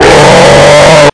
A Spooky Noise You Can Use For Horror Games!